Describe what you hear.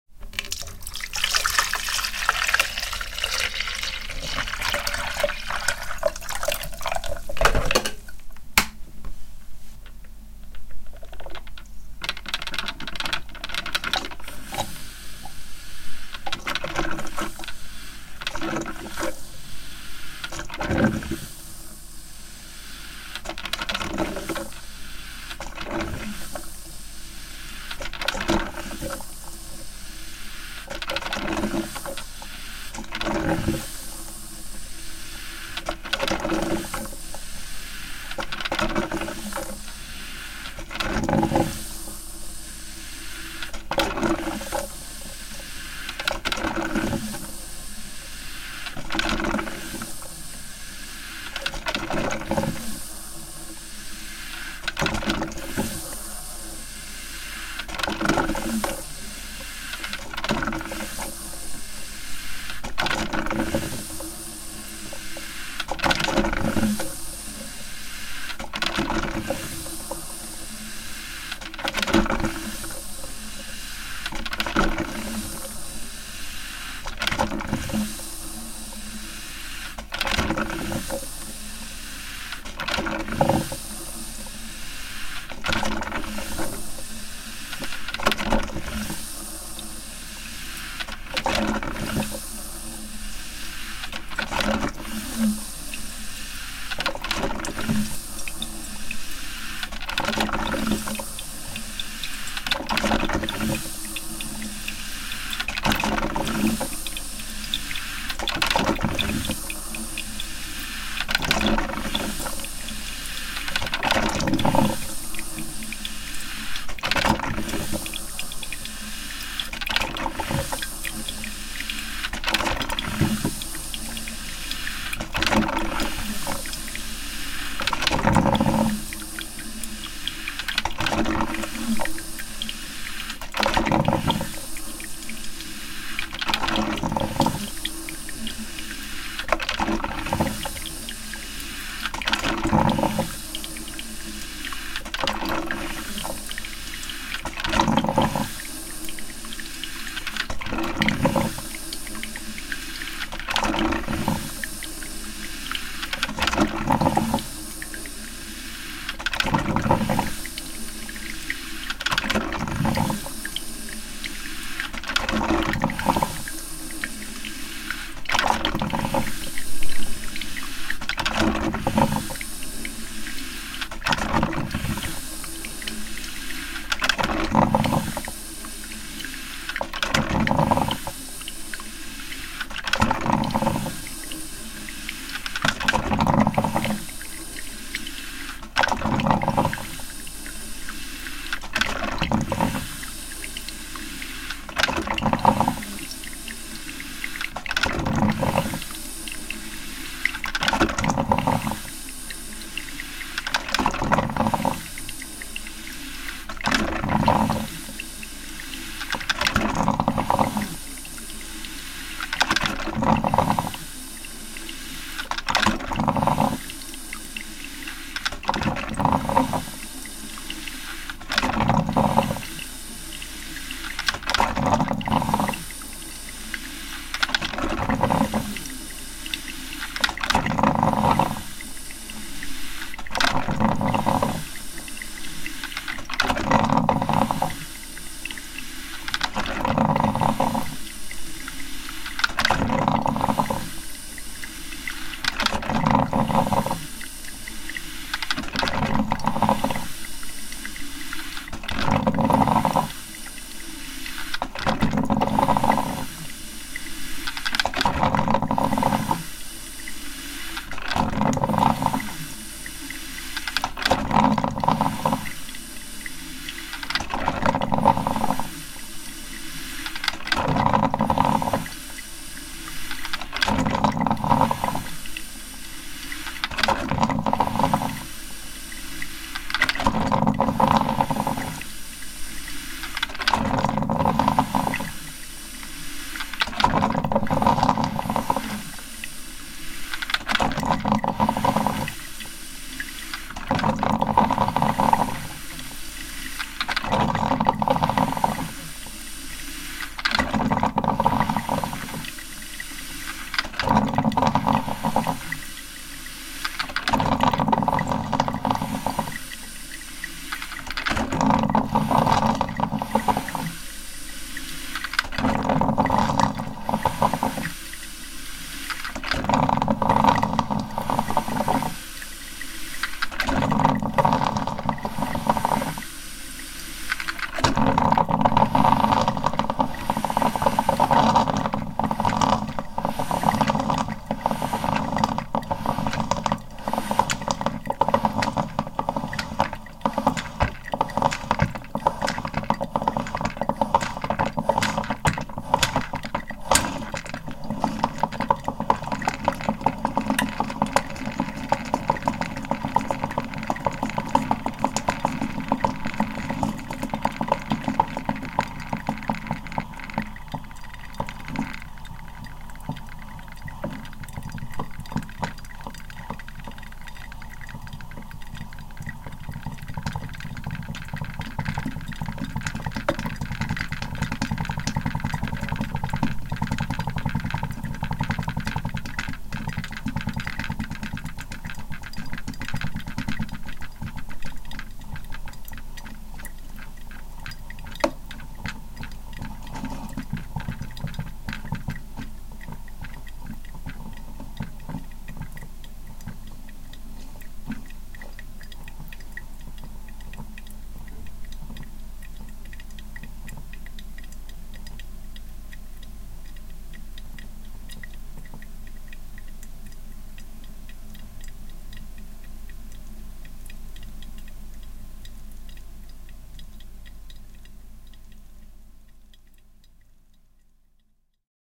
Just a regular coffee machine.
recording equipment used - pro tools, mbox 3 and sE Electronics X1
koffein, kaffekokare, kaffe, kettle, cofe, machine